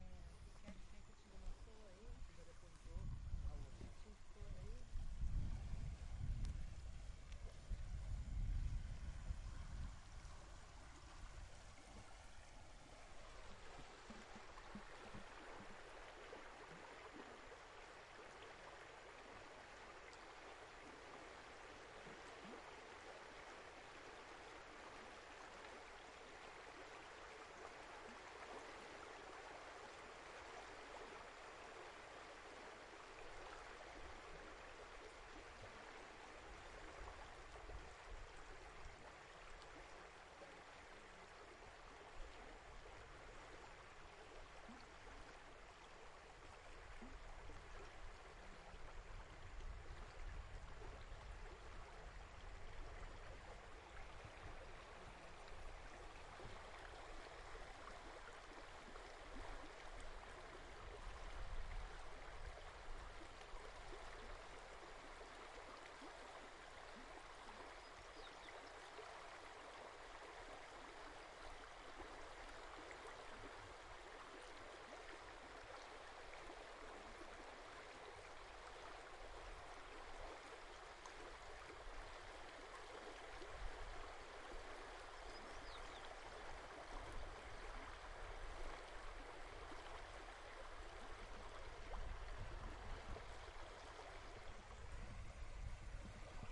ambi - agua Rio
Quiet sound of the Doce River below the town of Rio Doce and above a hydroelectric dam. Recorded April 2016, nearly 6 months after the Fundão dam collapse.